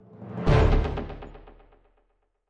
Victory Sound 3
Created this for a video game I'm developing with a bunch of friends. Hopefully someone else will be able to make use of it for a game or something as well!
Produced with Ableton.
victory-sound, victory-sound-effect, video-game, video-game-victory-sound, video-game-win-sound, weapon-get, weapon-get-sound-effect